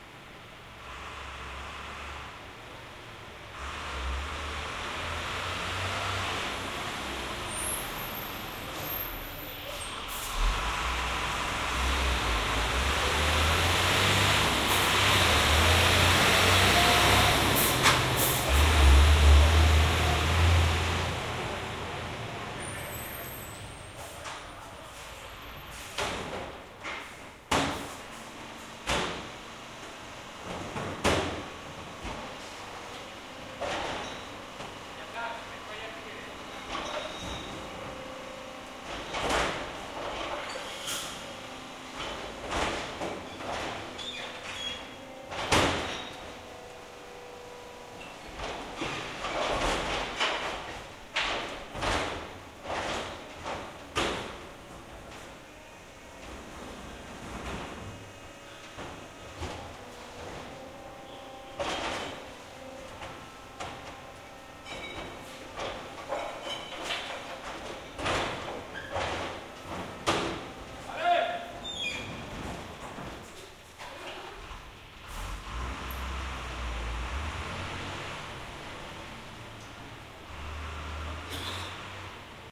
garbage.coll.serv.DS70P
truck approaching from right, rubbish containers slamming, voices,
glasses, one shout, and truck going away. Recorded with Sony stereo DS70P and iRiver iHP120/ por la derecha llega un camion, contenedores de basura golpeando, voces, cristales, un grito, y camion que se aleja
streetnoise, sevilla, city, lorry, rubbish, truck